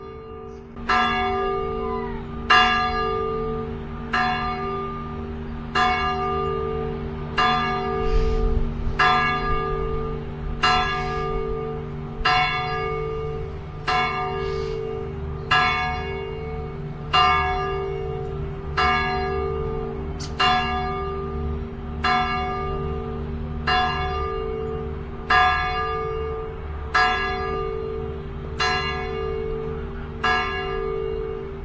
Field recording of single church bell. Made with Panasonic binaural mics to minidisc. Distance 100 metres. Occasional background voice and bird screech.
bell, field-recording, binaural, church